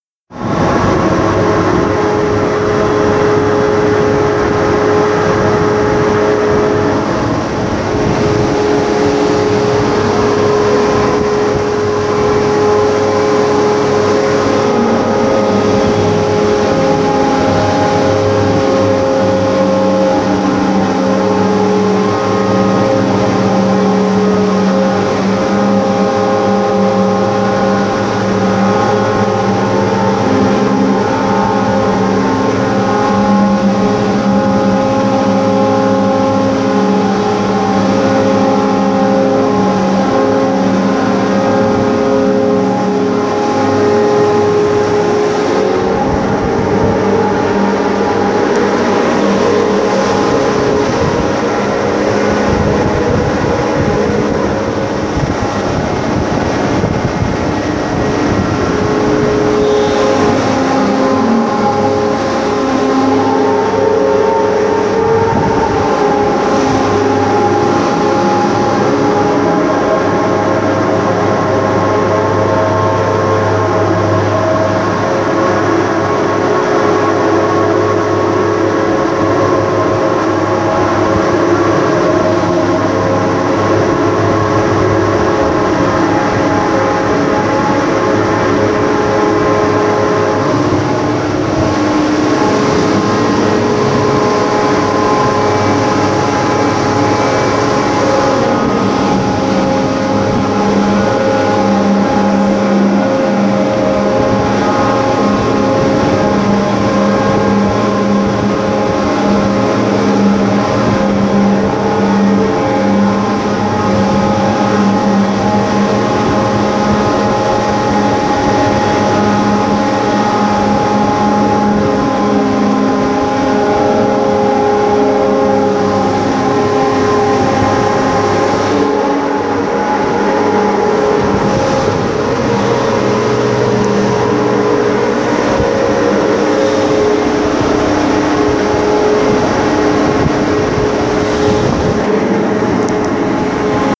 Ship Crane
This recording made with my mobile's mic. It was recorded inside the crane of a ship.
Field, Greece, Port, Recording, Ships